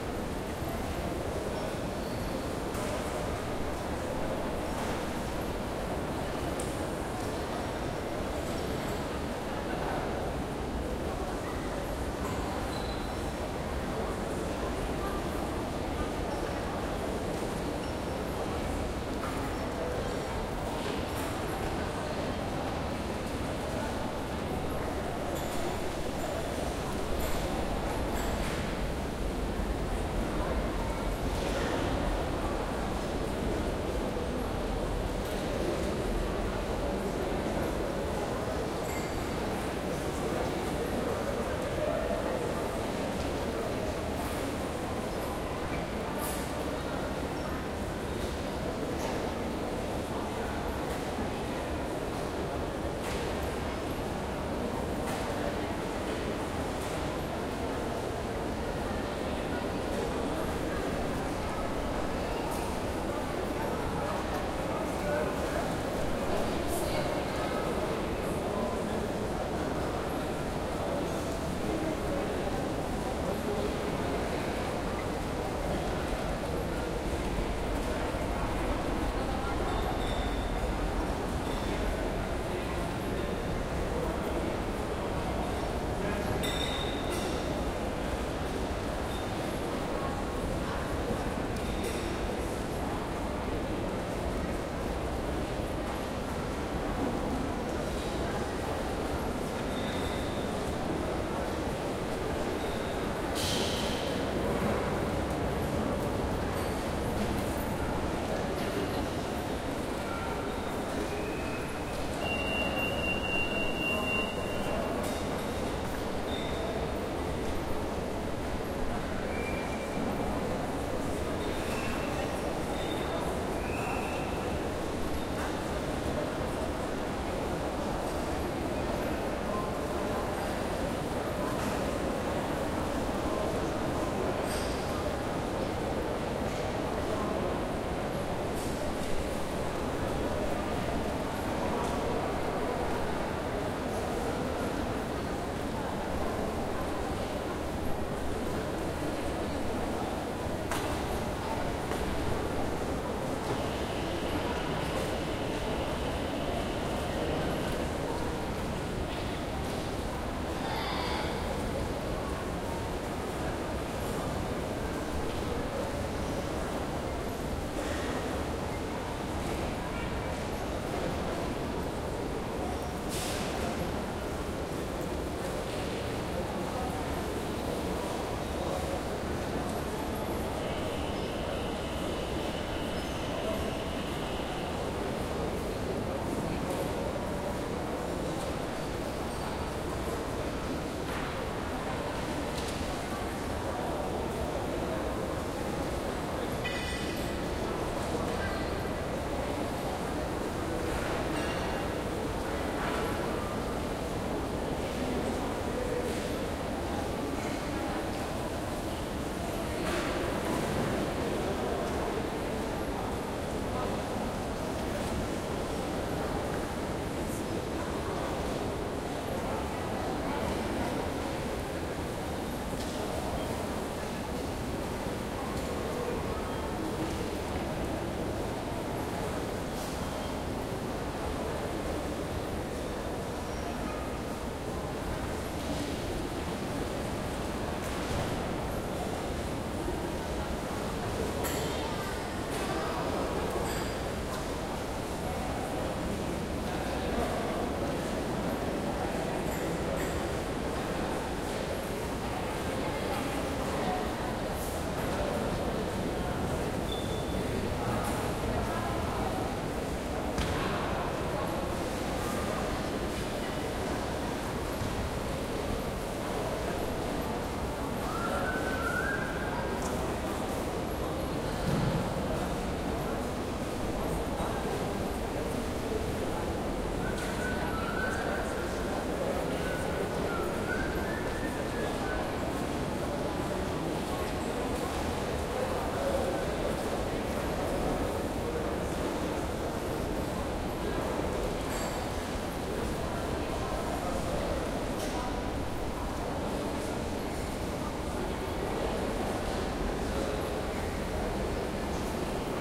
ambience Vienna Mitte shopping mall TheMall 2nd floor
Ambience recording of the 2nd floor in the shopping mall "The Mall" in Vienna, Austria.
Recorded with the Zoom H4n.